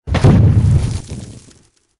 My attempt at Hollywood's sound superheros make when they fall from a great height
Original recordings:
"Water Explosion 1" by TBoy298, cc-0
"Sand Pouring on Sand" by Martinimeniscu, cc-0
boom, debris, impact, landing